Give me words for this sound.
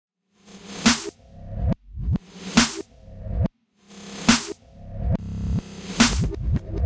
Reverse snare percussion loop
8-bit, awesome, game, hit, loops, music, sample, synth, synthesizer, video